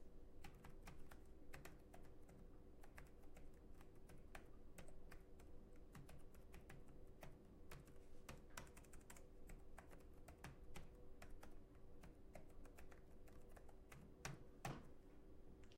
Laptop Typing
Typing on a laptop keyboard.
typing, keyboard, computer, business, laptop, type